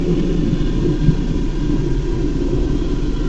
scary ambient loop
low rate breathing
halloween, ambient, scary, loop